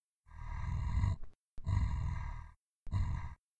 A breathy growl, slightly resembles purring. Could be good for a big cat?
Recorded into Pro Tools with an Audio Technica AT 2035 through the Digidesign 003's preamps. Some timestretching and snipping in post for articulation.